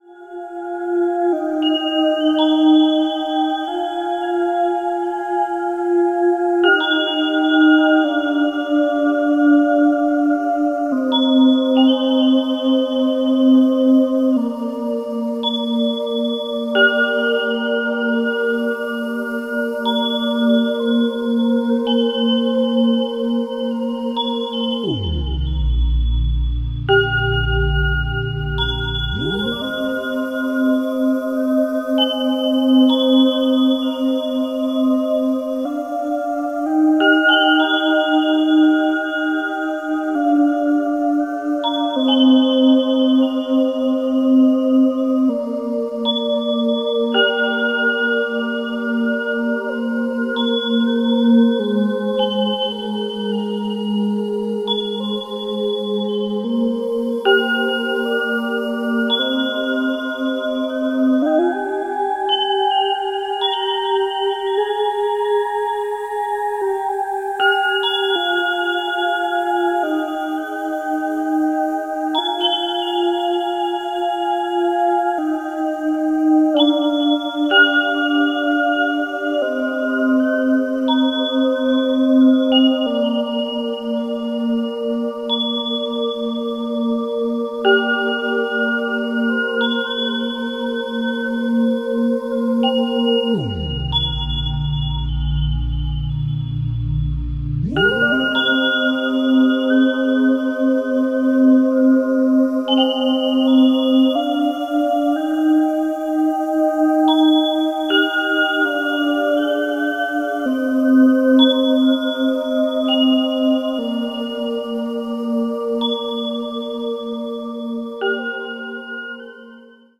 1.This sample is part of the "Padrones" sample pack. 2 minutes of pure ambient droning soundscape. Nice ambient melodies.